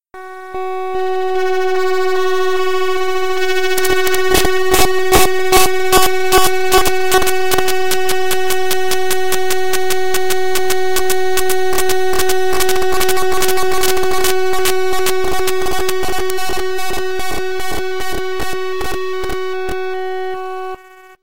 Raw import of a non-audio binary file made with Audacity in Ubuntu Studio